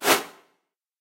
Edited white noise (EQ, attack, release, reverb) to reflect the sound of a sword cutting air. This is one of three alternating sounds. Recorded with a Sony PCM M-10 for the Global Game Jam 2015.
computer-game, effect, video-game, sfx, sword, noise, game